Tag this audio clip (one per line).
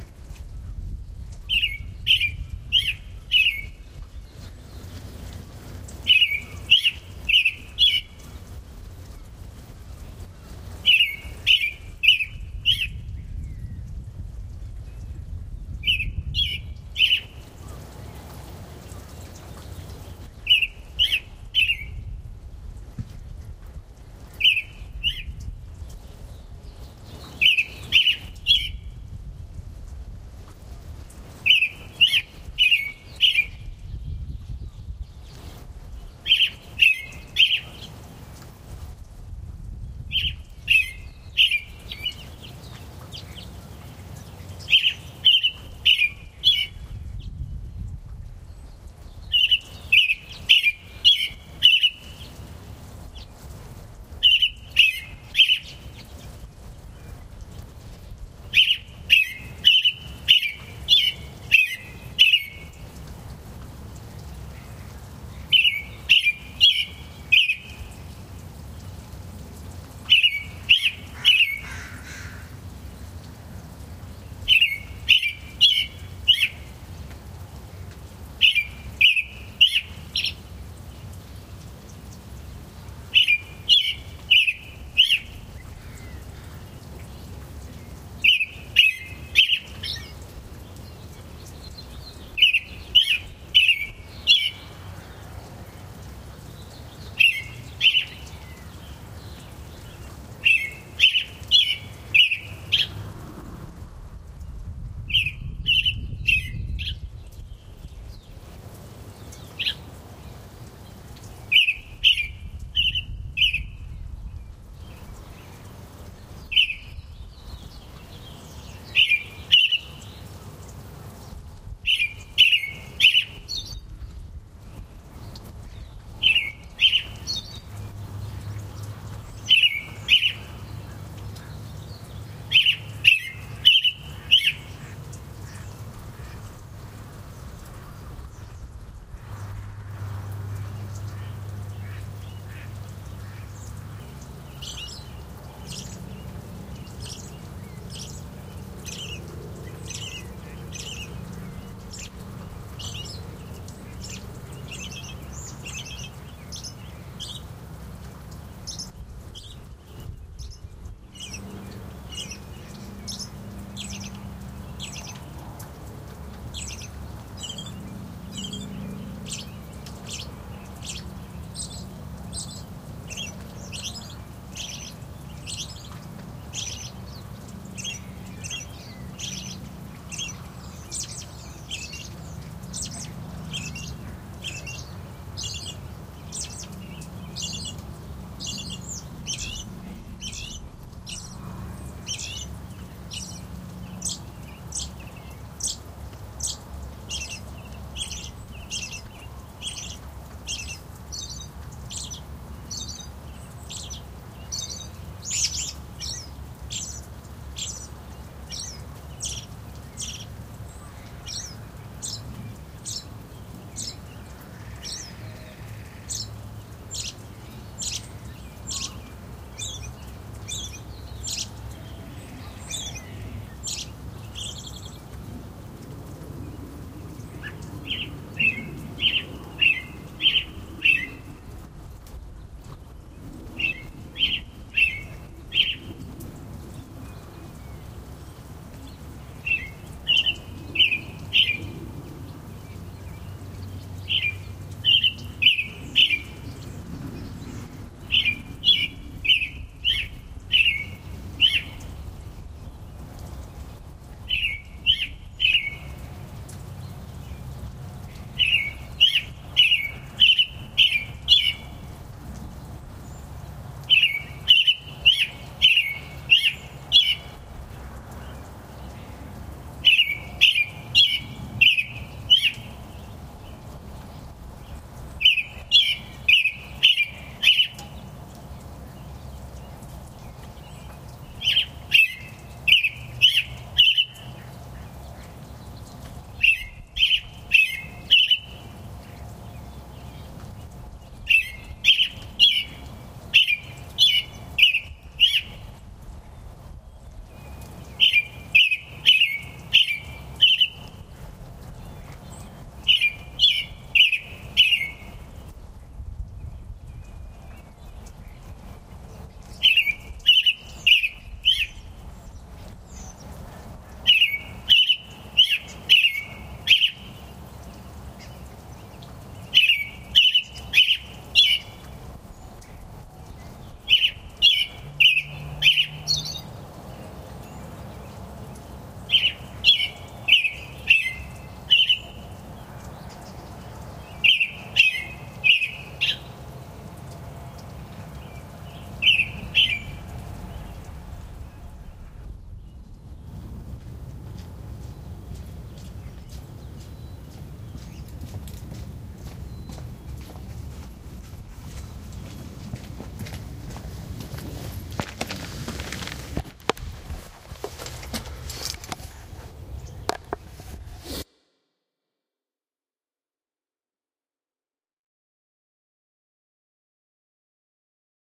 Ladner
drizzle
birdsong
robin
early
morning